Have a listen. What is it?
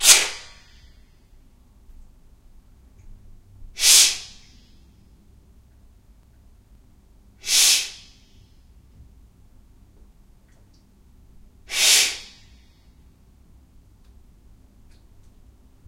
Wet Hat Sounds
Other than cutting, slicing-- no effects were applied. Kind of a hi-hat sound.